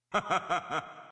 a man laughing sound made from my yamaha psr
creepy, evil-laugh, horror, man-laughing